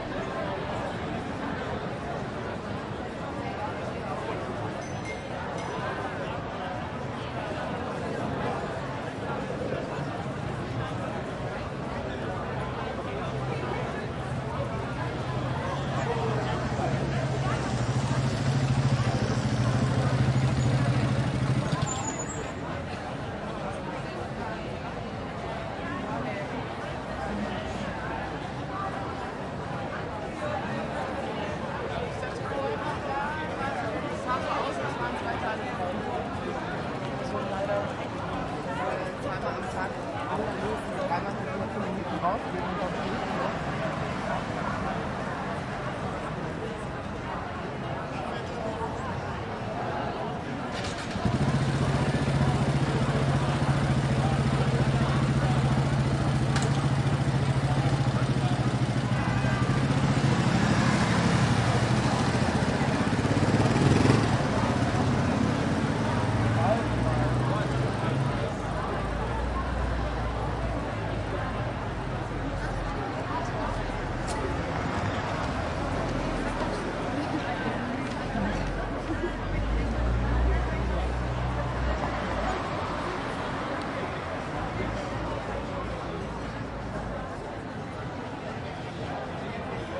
Street cafe very busy, amotorycle is stopping by, summer in the city,